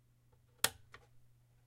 SWITCH OFF 1-2
Light switch turning off